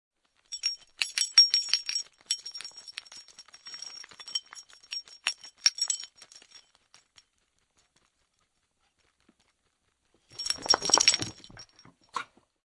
Dog scratching and shaking
Recorded on Marantz PMD661 with Rode NTG-2.
A dog (Staffordshire Bull Terrier) with a metal collar tag scratching itself then shaking.
tag, scratch, staffie, dog, collar, scratching, staffordshire-bull-terrier, shake, shaking, metal